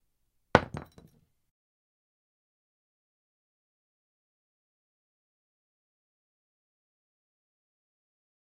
OWI GlassBottle dropping
glass bottle dropping